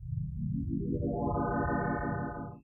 Drifting into Dreamland
A dream/flashback sequence
abstract, dream, dreamlike, effect, flashback, FX, imagination, mind, sequence, SFX, sound, weird